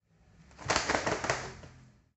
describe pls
este audio hace parte del foley de "the Elephant's dream"